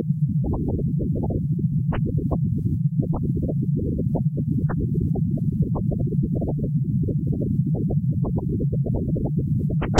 Read the description on the first file on the pack to know the principle of sound generation.
This is the image from this sample:
At this point the left channel's volume was extremely low compared to the right channel. I did boost it up in a sound editor by compressing a coule of times. However, the sound on the left channel is mostly noise... possibly due to the low volume...
I still think there is quite a lot of sound variation from one iteration to the next... but at this point I got tired and decided to stop for the day. More tomorrow.